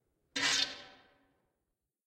Metal scrape 1
Recording of metal scraping against a cement surface.
metal, steel, scrape